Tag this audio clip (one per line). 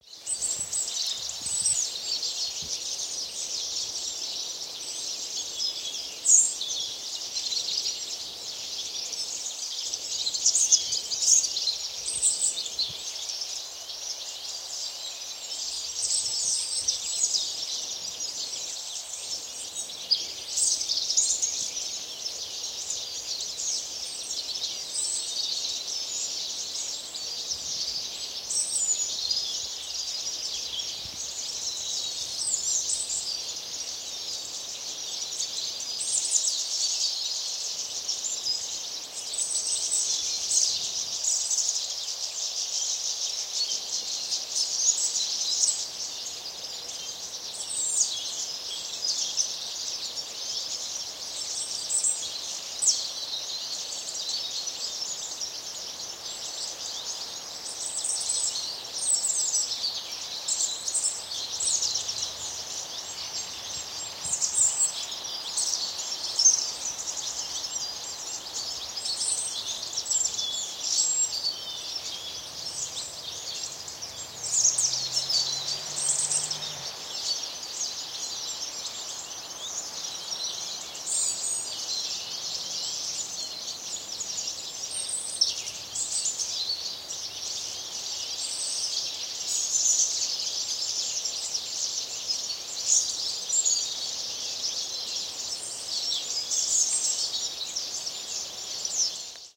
birdsong
bird
nature
birds
forest
ambient
spring
field-recording
many